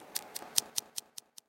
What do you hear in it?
FUZET Benjamin 2014 2015 Iceanxiety
Made using Audacity& Recording Lighter Sound with dynamical microphone
1. Effect: Change Tempo: 40% & Change Speed: 30%
2. Effect: Amplify: 11.0 dB
3. Effect: High Pass Filter. Rolloff: 6dB. Cutoff frequency: 1000.0
4. Effect: Delay. Type: regular. Delay level: -6.0. Delay time: 0,20seconds. Pitch change effect: Pitch/tempo. Pitch change: 0.00. Number of echoes: 4. Allow duration to change: YES.
Typologie de Schaeffer: V'' Itération Variée
Morphologie
Masse: Son cannelé
Timbre harmonique: Eclatant
Grain: Grain d'itération
Allure: Naturelle
Dynamique: Graduelle
Profil mélodique: Variations scalaires
Profil de masse:
Site: Un son qui descend et surplombé par un autre qui monte en claquant
Calibre: Filtrage des aigues
Lyon, Ice